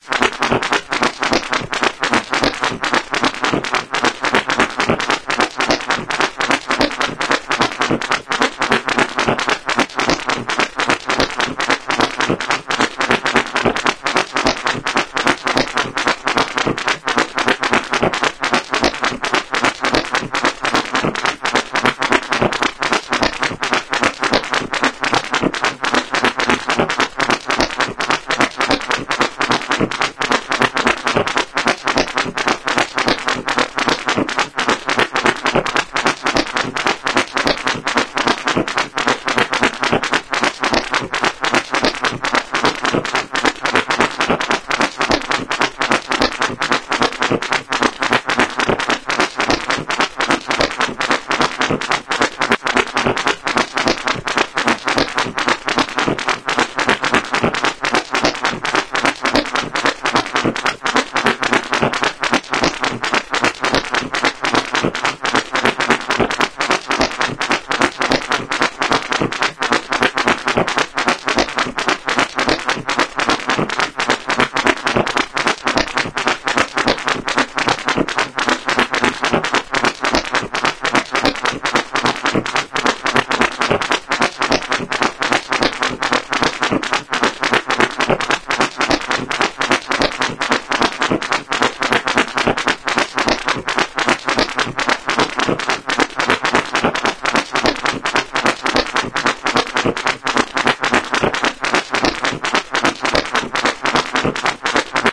weird beat 3
A little weird beat